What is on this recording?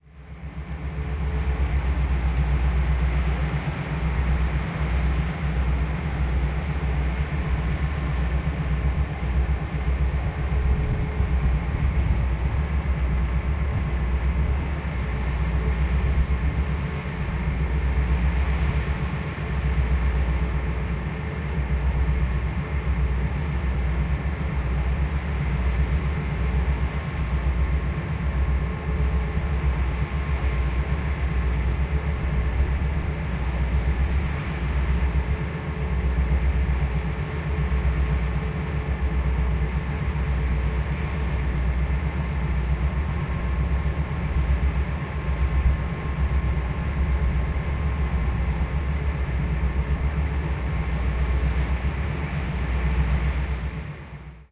Deck of a small ferry, diesel engines and hissing bow wave. II has more passenger chatter than I.